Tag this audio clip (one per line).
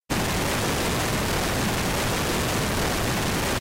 abrasive
gabber
noise